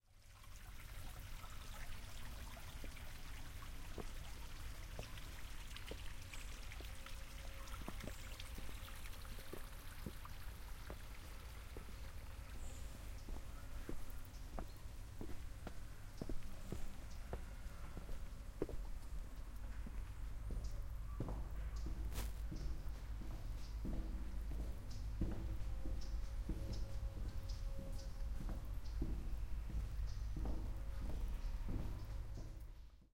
Water Fountain
Water foutain on Parque de Serralves, Porto.
cam, fountain, ulp, ulp-cam